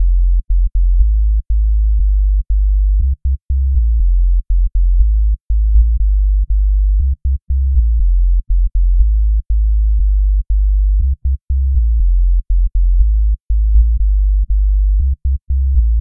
zulu 120 F# Test Tone bass1
Roots rasta reggae